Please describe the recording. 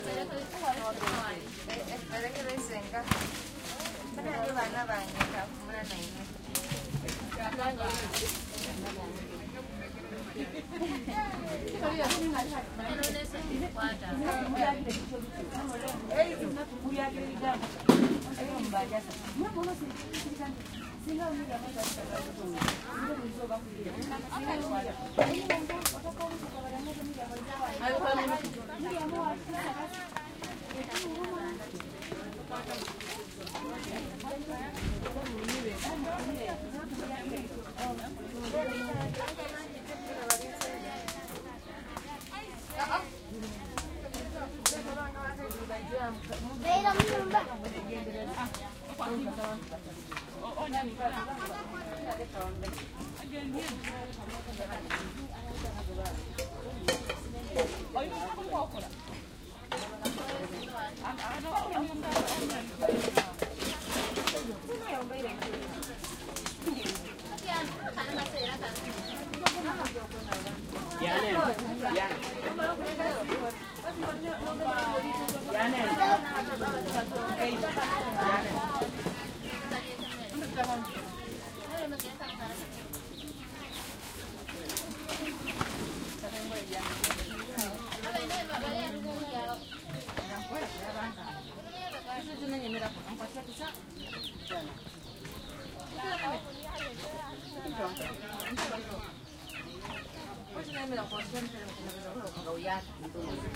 village crowd active corner voices preparing fire to cook and banging pots and pans Putti, Uganda, Africa 2016
active, Africa, crowd, people, Uganda, village